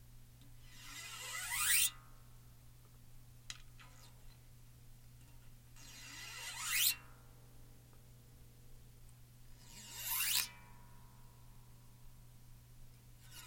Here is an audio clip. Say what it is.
running a coin up and down on a bass guitar string at a high speed
MTC500-M002-s14, bass, guitar